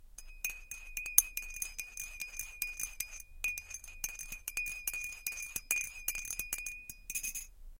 Tea with spoon
coffee, cup, milk, mix, mug, spoon, stir, stirring, sugar, tea